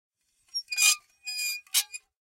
Small glass plates being scraped against each other. High pitched and articulate. Close miked with Rode NT-5s in X-Y configuration. Trimmed, DC removed, and normalized to -6 dB.